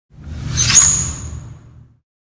VSH-53-plastic-hand-rub-squeak-short
Plastic foley performed with hands. Part of my ‘various hits’ pack - foley on concrete, metal pipes, and plastic surfaced objects in a 10 story stairwell. Recorded on iPhone. Added fades, EQ’s and compression for easy integration.
crack, fist, hand, hit, hits, human, kick, knuckle, plastic, pop, slam, slap, slip, smack, squeak, sweep, thump